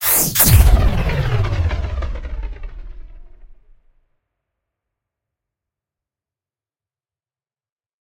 Plasma cannon shot 1-1(3lrs,mltprcssng)
The sound of a sci-fi plasma cannon shot. Enjoy it. If it does not bother you, share links to your work where this sound was used.